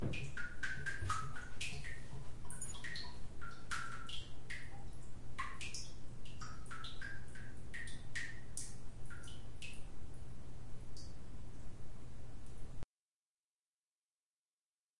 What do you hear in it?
Drippy sound
drip, dripping